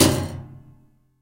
A plastic ruler stuck in piano strings recorded with Tascam DP008.
Une règle en plastique coincée dans les cordes graves du piano captée avec le flamboyant Tascam DP008.
detuned, piano, prepared